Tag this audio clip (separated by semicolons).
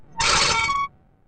sound-design; digital; nord; screech; mean; metal; metallic; terrible; scrape